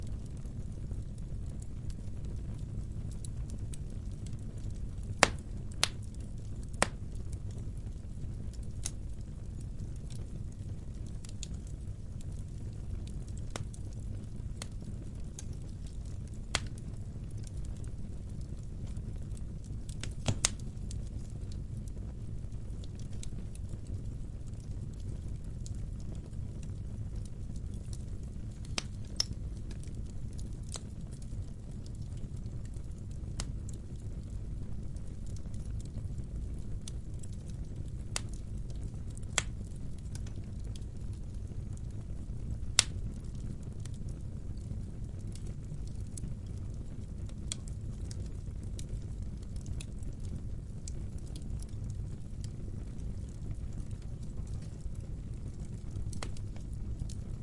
fire large rumbling in fireplace nearby center more roomy good detail warm with loud snaps
fireplace, loud, snaps, rumbling, fire, large